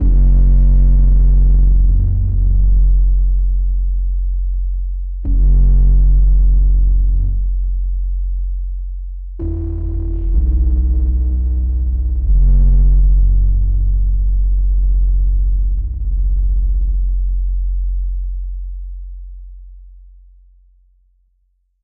Bass Loop 1
Bass, deep, effect, frequency, fx, low, sound, sub, subwoofer, wave, woofer